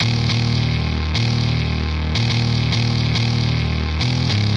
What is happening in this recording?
105 Necropolis Synth 03
heavy gut synth
dark, free, grunge, halloween, loop, necropolis, synths